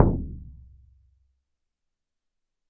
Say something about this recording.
Shaman Hand Frame Drum 17 02
Shaman Hand Frame Drum
Studio Recording
Rode NT1000
AKG C1000s
Clock Audio C 009E-RF Boundary Microphone
Reaper DAW
bodhran, drum, drums, frame, hand, percs, percussion, percussive, shaman, shamanic, sticks